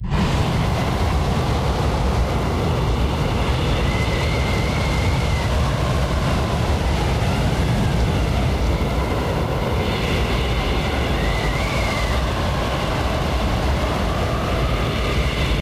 Ice Spell - Blizzard, Wind, Blast
Spell - Gout of ice and snow.
Hunting trolls since 2016!
BTC: 36C8sWgTMU9x1HA4kFxYouK4uST7C2seBB
BAT: 0x45FC0Bb9Ca1a2DA39b127745924B961E831de2b1
LBC: bZ82217mTcDtXZm7SF7QsnSVWG9L87vo23